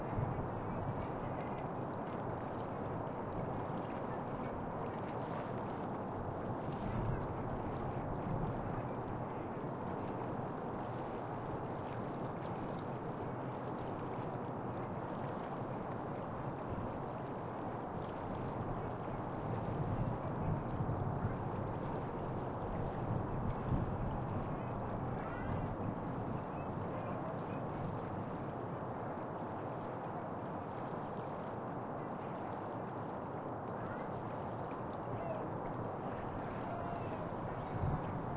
Night-time wide angle stereo recording of Manhattan, as heard from across the East River, the recorder was kindly allowed access to the veranda of the Riverview Restaurant & Lounge in Long Island City and is facing the Manhattan skyline. Some noises of the restaurant can be heard in the background, very subdued, the quiet lapping of the East River can be heard in the foreground, and Manhattan bustle and traffic on the FDR Drive is in the wide range.
Recorded in March 2012 with a Zoom H2, mics set to 90° dispersion.